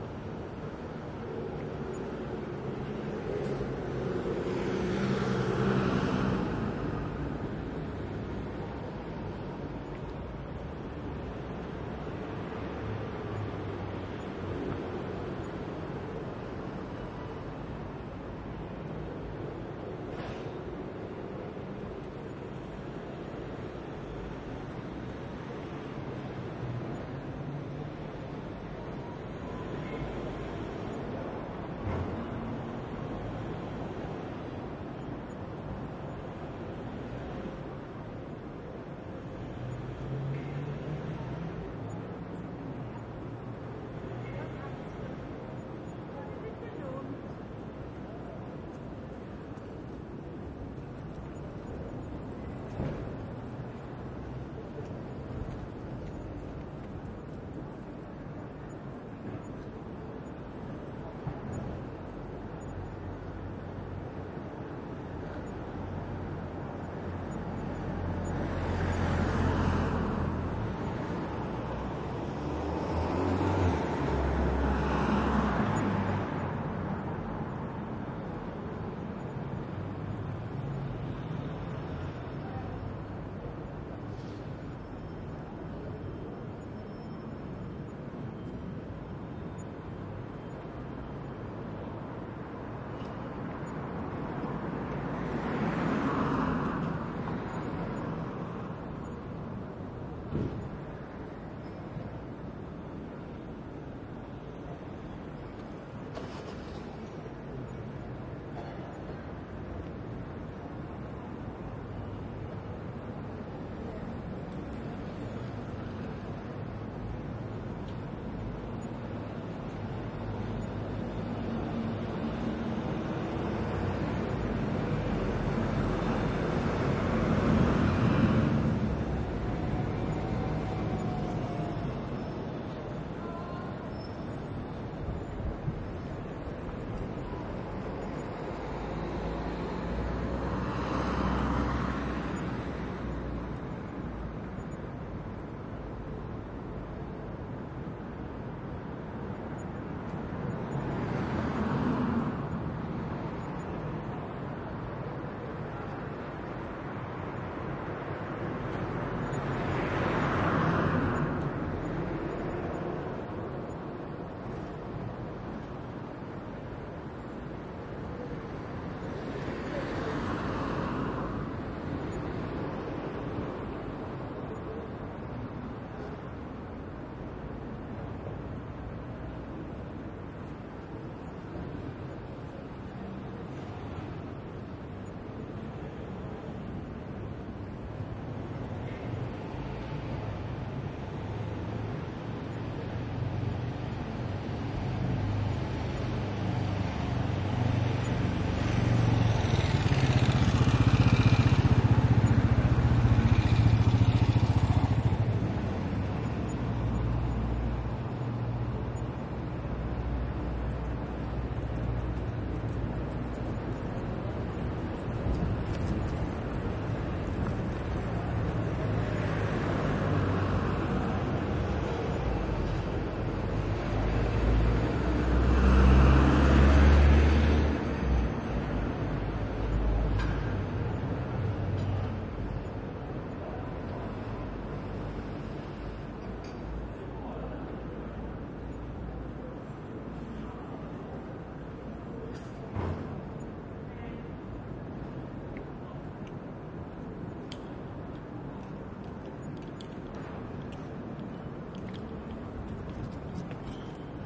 170717 Stockholm Bondegatan R
General soundscape of the Bondegatan in Stockholm/Sweden. It is a sunny afternoon and there is a moderate amount of pedestrian and automobile traffic underway. The recorder is situated at ear level on the sidewalk, facing into the center of the street.
Recorded with a Zoom H2N. These are the REAR channels of a 4ch surround recording. Mics set to 120° dispersion.